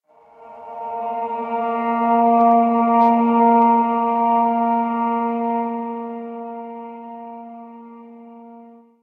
oboe sequence 6
oboe processed sample remix